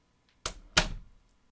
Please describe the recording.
A house door closing